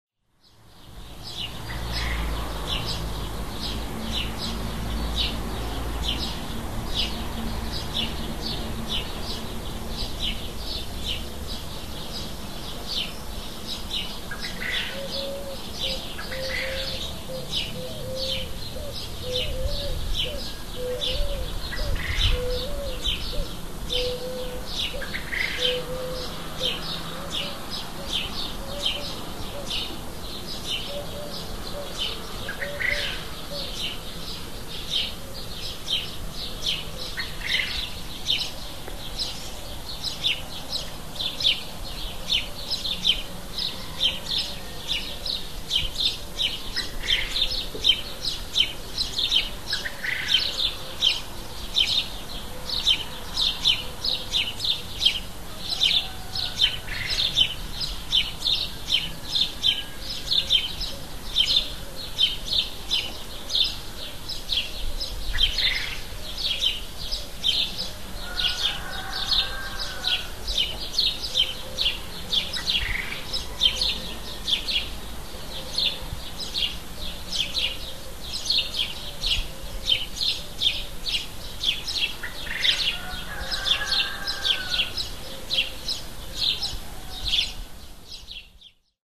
Cyprus dawn chorus
A recording i made last April outside my house in Cyprus.You can hear house sparrows, a collared dove, a rooster in the distance and a bird which i don't know what it is.
Update.
After many years I found out that the unknown bird in the recording was a quail.
Some people in Cyprus grow up quails either for the eggs they produce and they pickle them, or some others like the meat of the quail when they grow up.
I used my minidisc recorder with a stereo microphone but the sound is mono because my laptop hasn't got a stereo line in.
ambient-sound, birds, cyprus, dawn-chorus